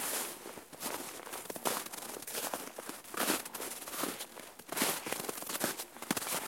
I recorded the sound of walking in the deep snow.
forest, snow, deep, walking